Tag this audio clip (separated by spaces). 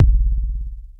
analog bd boom drum kick low monotribe percussion